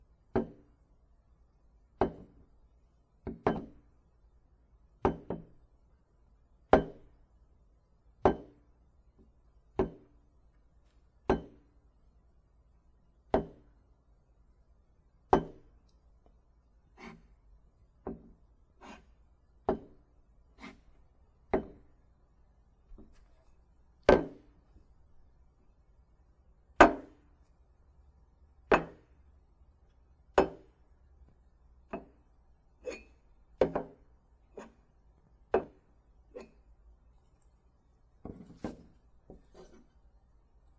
Glass Cup Set Down
Setting a glass cup down on the table.